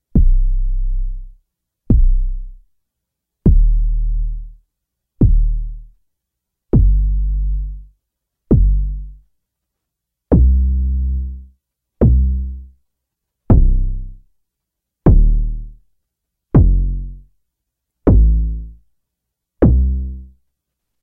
Best imitation of a 909 I could do on MP/4